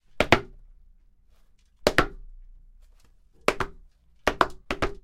More steps but these end in a higher pich.
foley, rhythm, steps